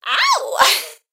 This sample pack contains people making jolly noises for a "party track" which was part of a cheerful, upbeat record. Original tempo was 129BPM. This is a female vocal making a sound almost like a cat's meow but perhaps more alluring.
129bpm, female, party, shot, shots, stab, stabs, vocal, vocals